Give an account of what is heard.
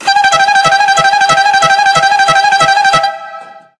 Tour De France horns
Tour de France car horn
announcement, car, clean, de, France, horn, tour